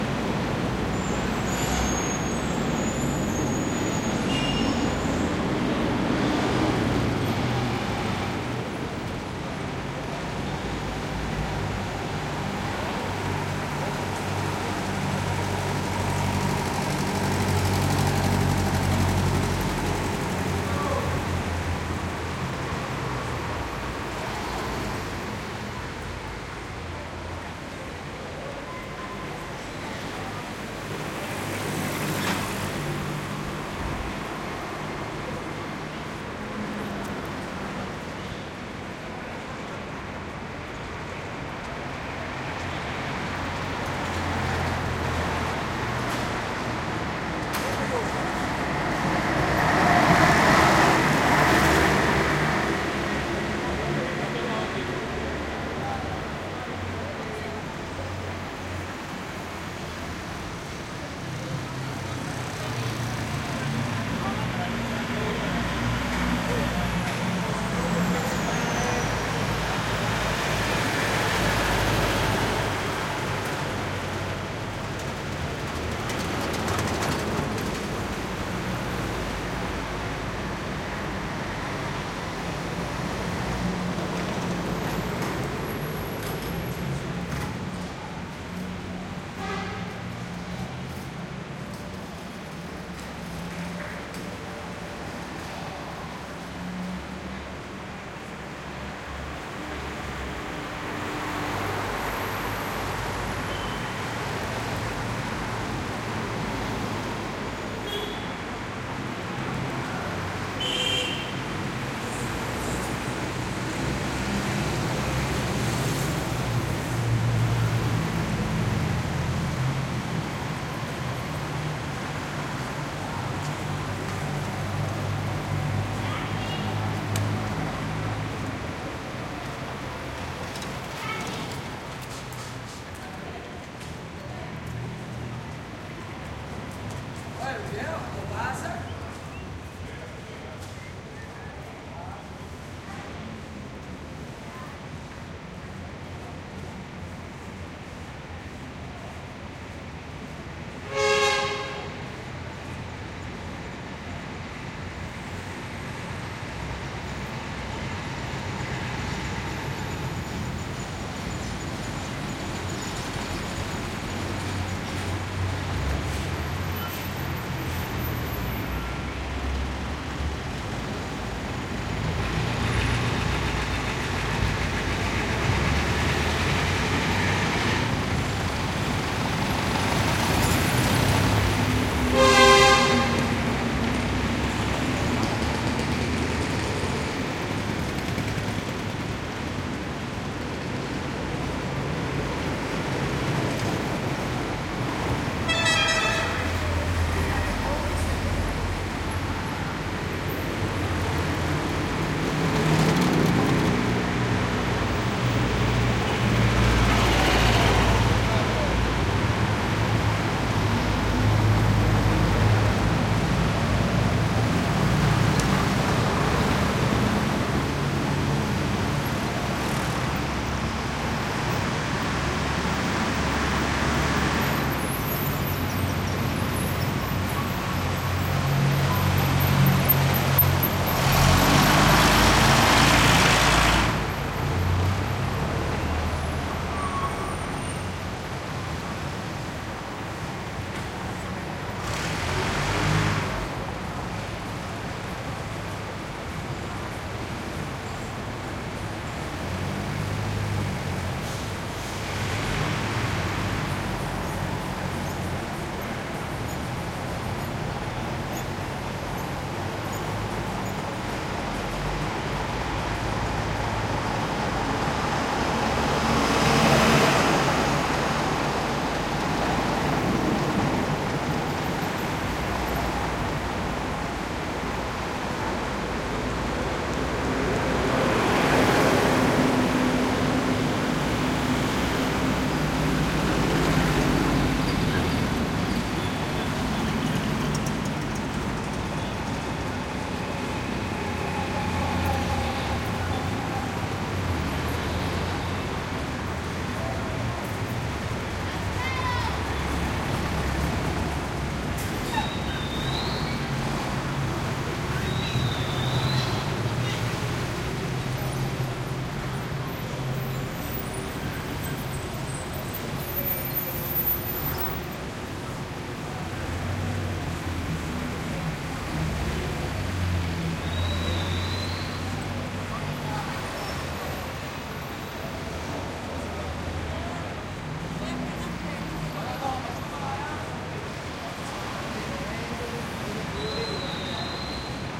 traffic heavy throaty downtown Havana, Cuba 2008